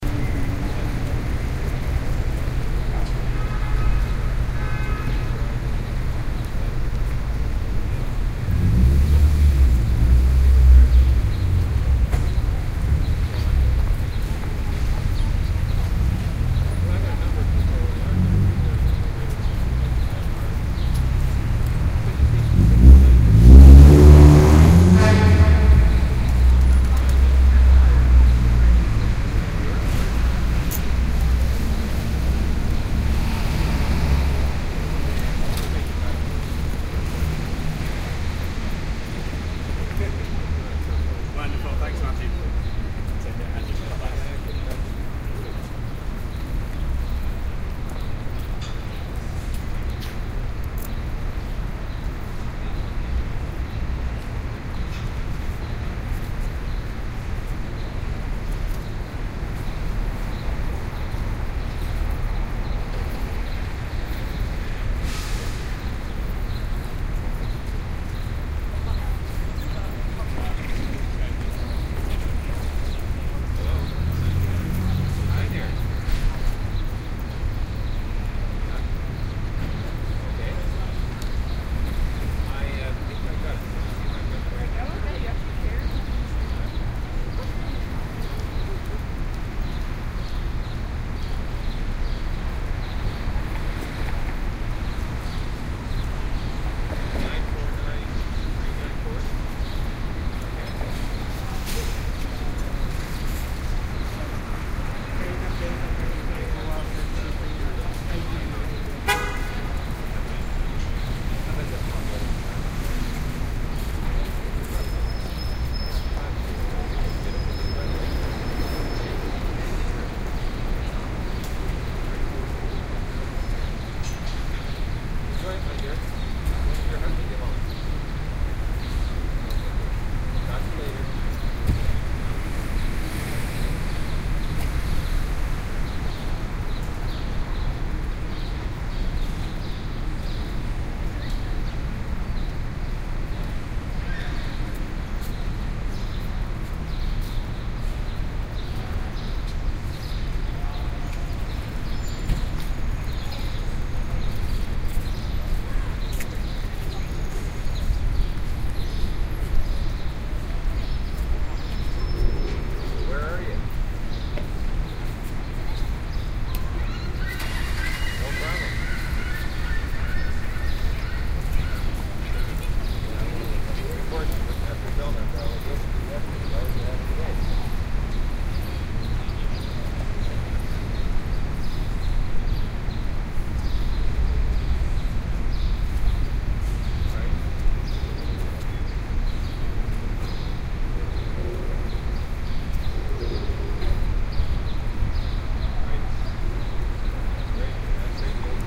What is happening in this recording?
Stereo binaural recording of a busy city street in the middle of the day.
city, atmosphere, ambience, field-recording, traffic, street, binaural, stereo